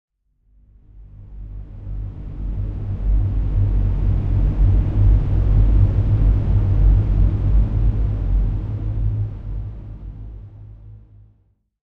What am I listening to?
Bass Rumbler
Low bassy pad with an ominous feel.
dark, dirge, edison, pad, single-hit